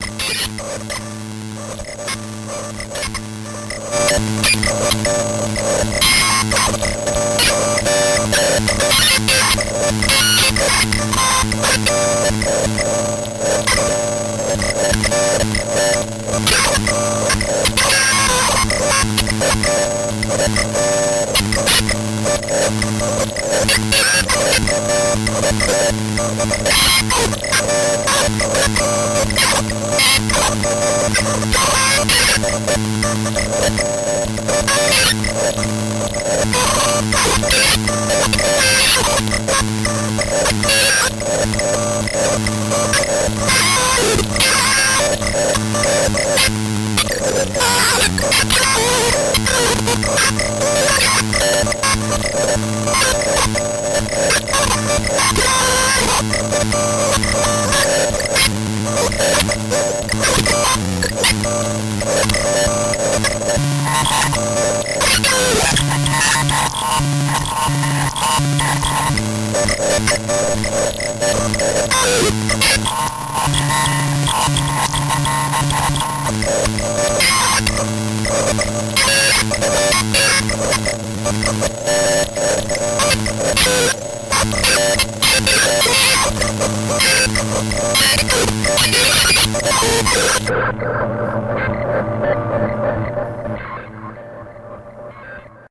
bent Speak & Spell
circuitbent speak&spell no effects